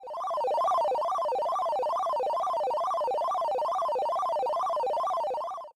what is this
Retro Melodic Tune 21 Sound
8bit, computer, cool, game, melodic, melody, old, original, retro, sample, school, sound, tune